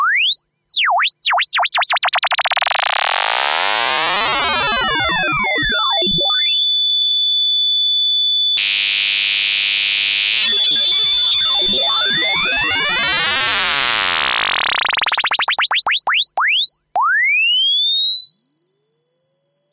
An 8bit trip to cyberspace. Created by sweeping frequencies on an old function generator.
synth, scify, trip